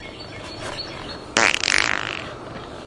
bird farts
fart poot gas flatulence flatulation
poot, flatulation, gas, flatulence, fart